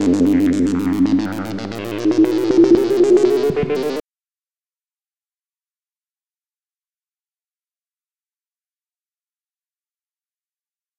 another possible lab or craft sound
alien, outerspace, space-ships